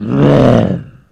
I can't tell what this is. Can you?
Zombie Roar 3
Recorded and edited for a zombie flash game.